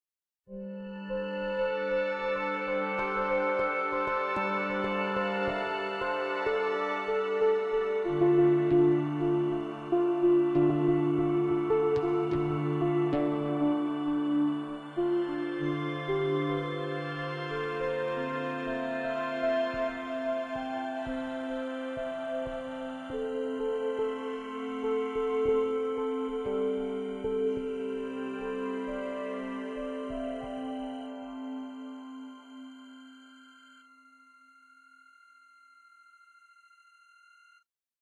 Electric Dream Ambient
A dreamlike ambient electronic track. Digital and hypnotic sounding.